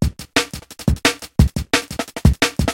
jungle beat 1
A simple Drum 'n' Bass beat (at about 175 bpm) I threw together the other night. Enjoy!